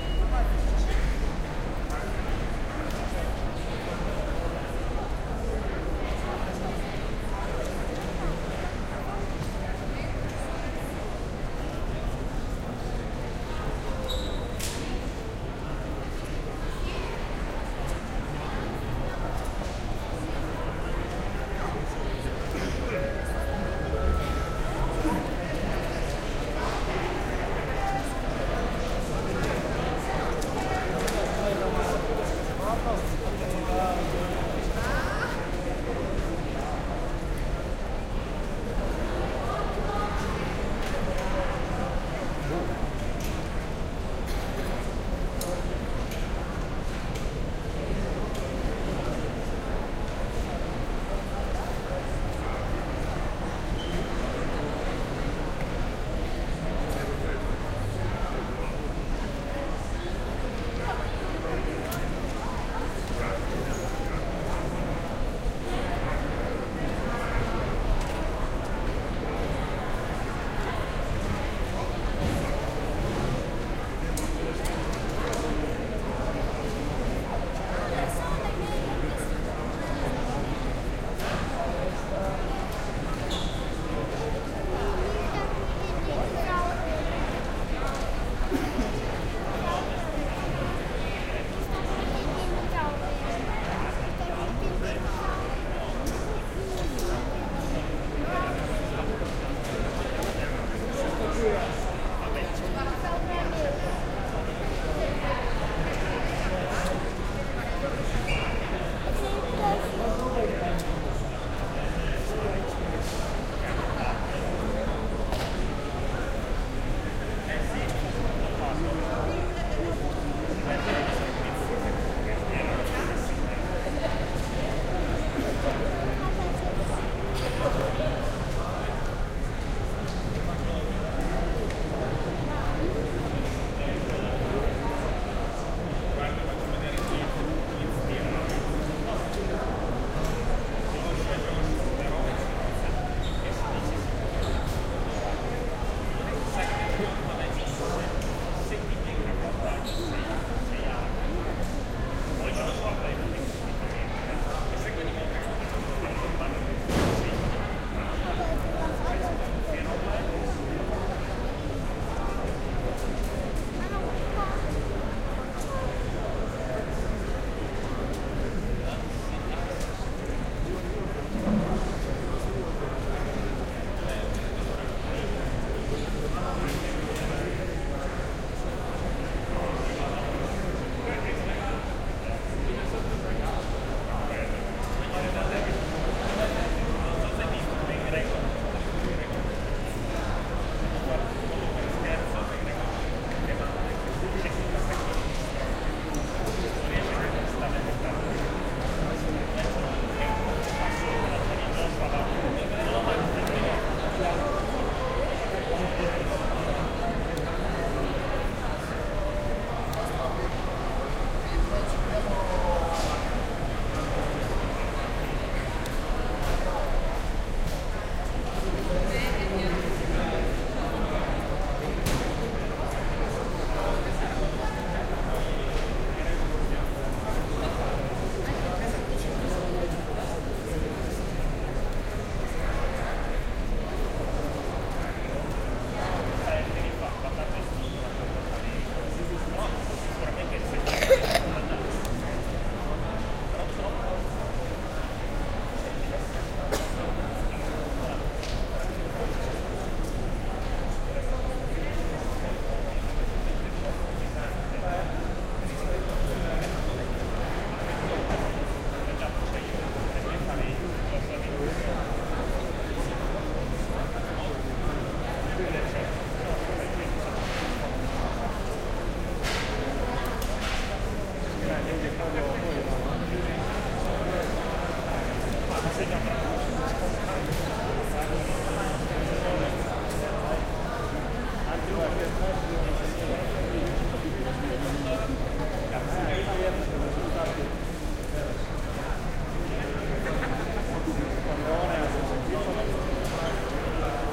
Waiting Hall Venice Marco Polo Airport VCE
Ambience of Marco Polo Airport / Venice, Waiting Hall. Recorded with LOM mikroUši Pro Stereo Microphones into a Art Dual Pre USB Preamp connected to a Android Phone using USB Audio Recorder App.
Airport, Ambience, Announcements, Environment, Marco-Polo, Planes, Venezia, Venice, Waiting-Hall